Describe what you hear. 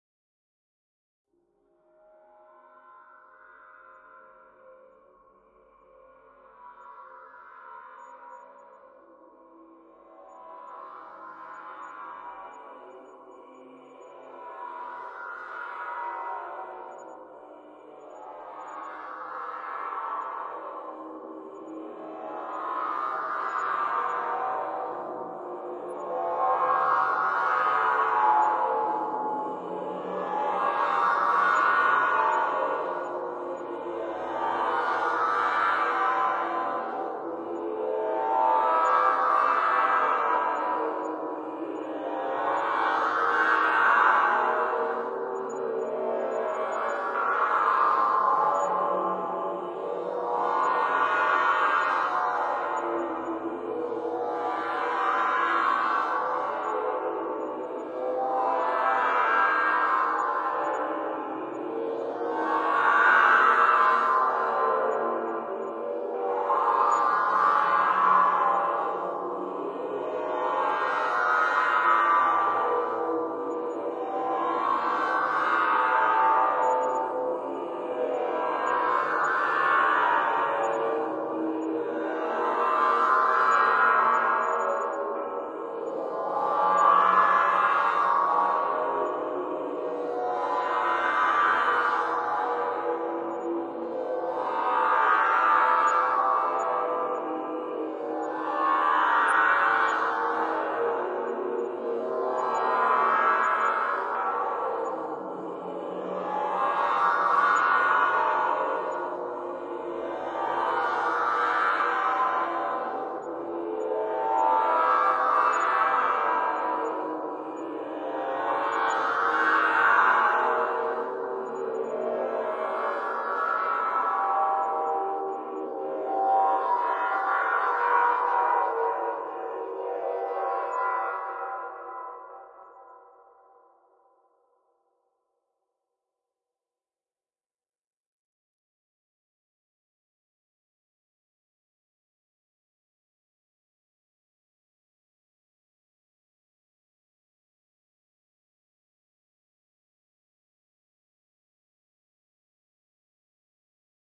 just some effects and a matrix encode
creepy, death, ghost, haunted, horror, scary, sfx, terror